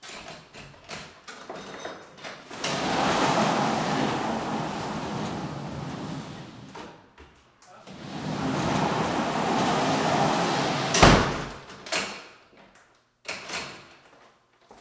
studio door
Opening and closing a large sliding garage type door at the loading dock to the building my studio is in. Recorded with a Shure sm7b by running 100ft of xlr cables down the hall from studio to door.
closing, door, garage, lock, shut, slam, sliding